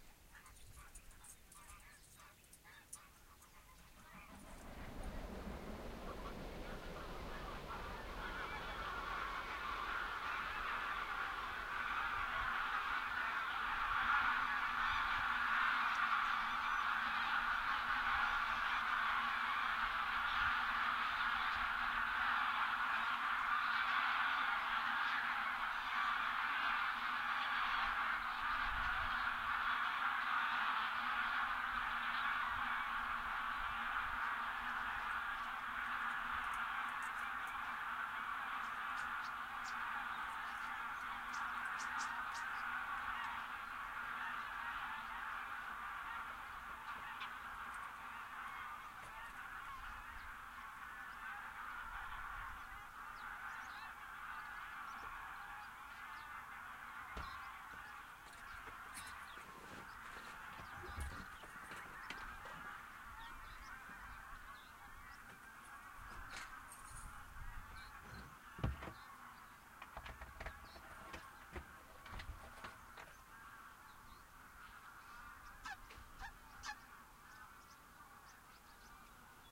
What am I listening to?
sony ecm-ms907,sony mindisc; a flock of geese taking off, stereo a bit unbalanced.